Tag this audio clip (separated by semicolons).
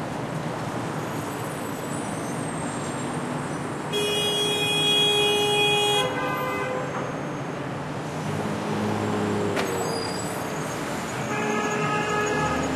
busy; cars; New-York; noisy; brakes; field-recording; city; NY; noise; traffic; ambience; car; horn; ambient; street; sweeper